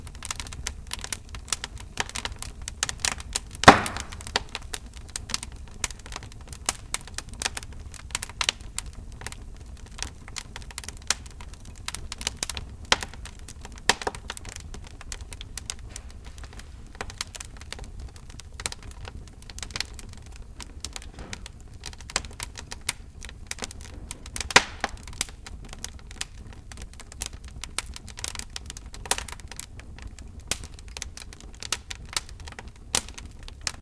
Fire with wet wood
Fire in a fireplace, the wood was wet, so lots of crackling and popping
field-recording, wood